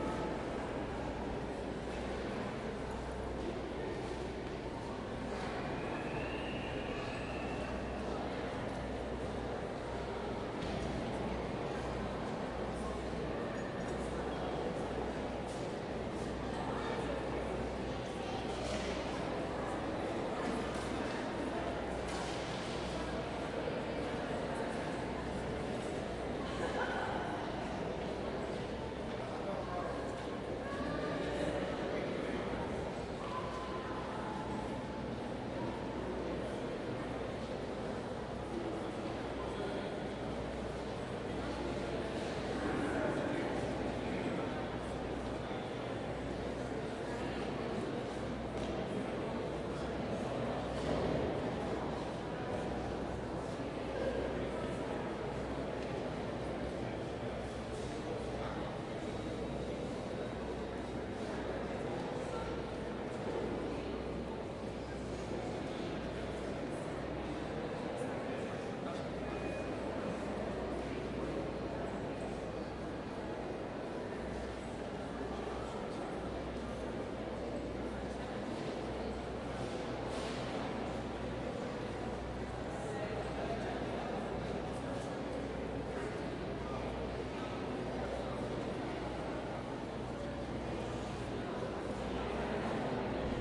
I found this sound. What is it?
musee.Victoria.Londres hall.entree
Victora Museum Summer 2013